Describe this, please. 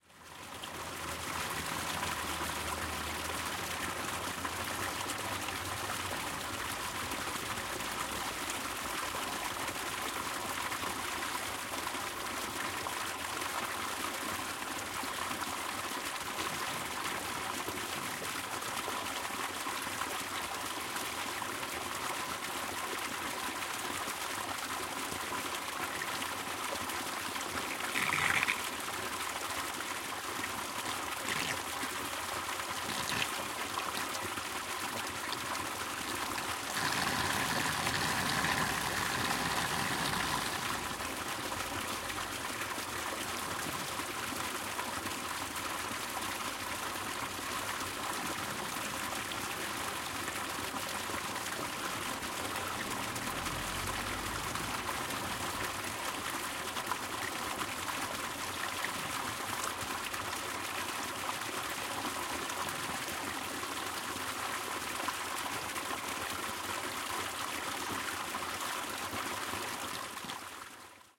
Alanis - Fountain in Plaza de la Salud - Fuente en Plaza de la Salud
Date: February 23rd, 2013
The sound of a fountain in Alanis (Sevilla, Spain). This was recorded in a cold evening, with little traffic around.
Gear: Zoom H4N, windscreen
Fecha: 23 de febrero de 2013
El sonido de una fuente en Alanís (Sevilla, España). Esto fue grabado en una tarde soleada, con poco tráfico alrededor.
Equipo: Zoom H4N, antiviento
square, fuente, liquido, Sevilla, water, Espana, grabacion-de-campo, field-recording, Alanis, agua, Spain, liquid, fountain, plaza